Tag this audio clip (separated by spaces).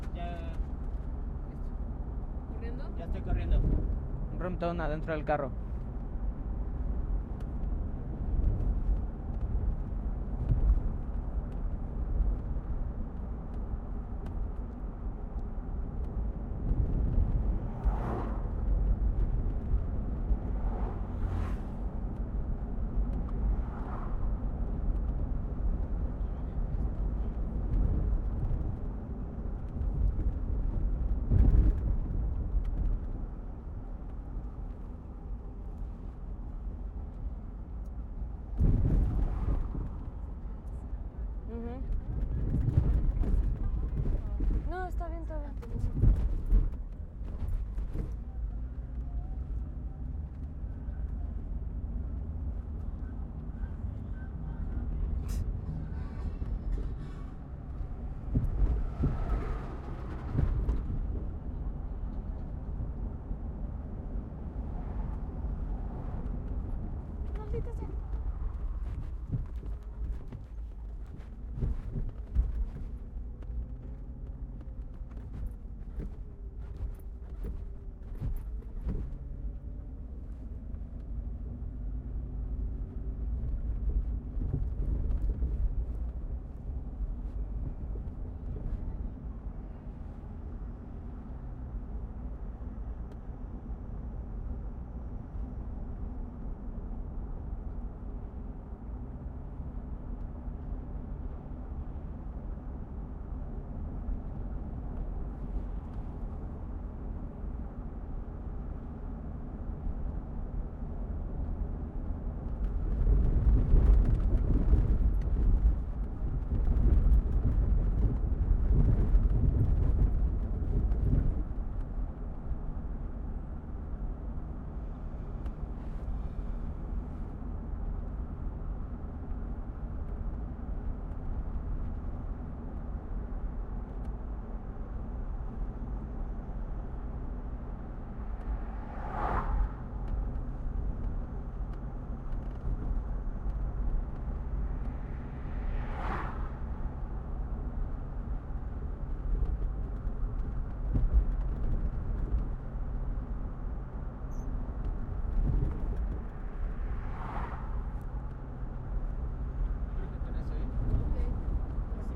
Car Driving Engine Highway Motorway Passing SUV Travel